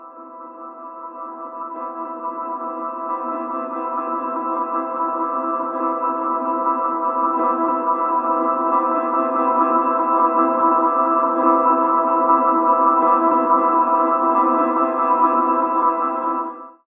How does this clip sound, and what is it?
The bestest rising-to-climax- piano sample ever!
A bunch of piano notes that lead to a climax, and drop out.
chill, chillout, dramatic, mellow, new-age, piano